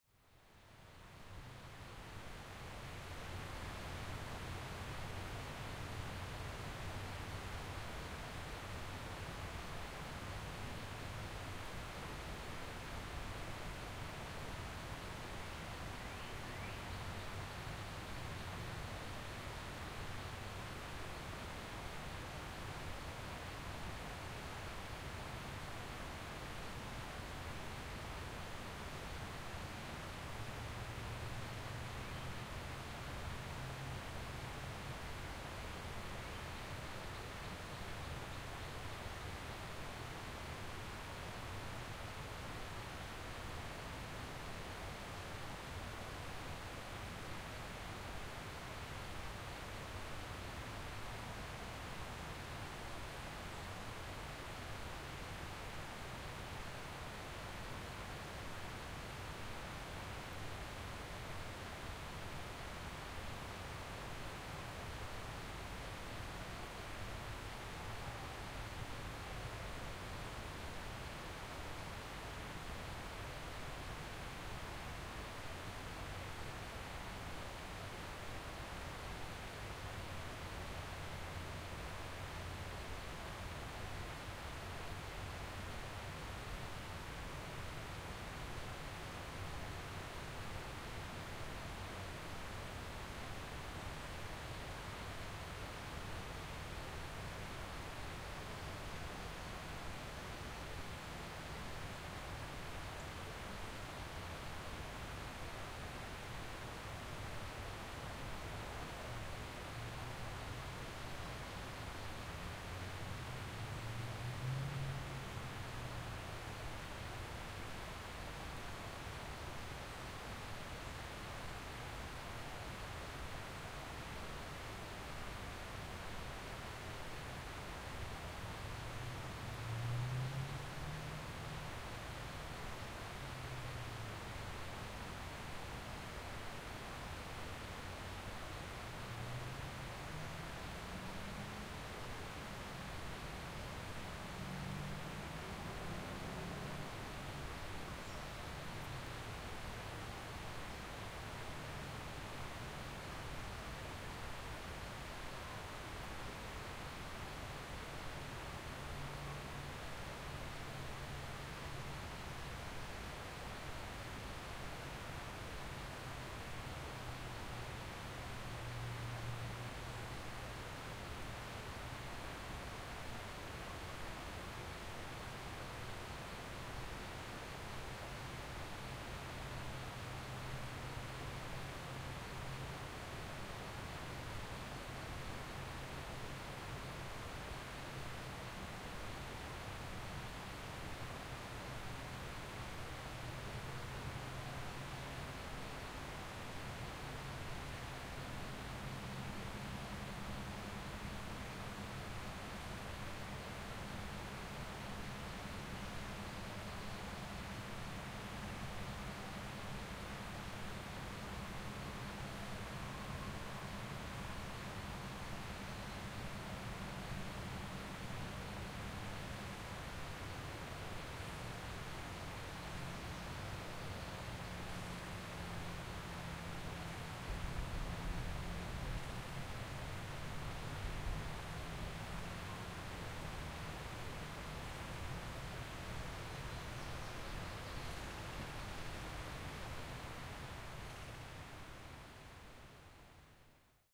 Stream in the woods
Went out to a park as far away from cars as possible (I still got a little bit of them in there...never realized how impossible it is to escape the sound of civilization!!!!) and recorded the sound of the forest along a pathway with a stream flowing to the side. Good general forest ambience sound for a theatre production or something. Recorded with my Zoom H4n and it's built-in mics. XY array at 120 degrees.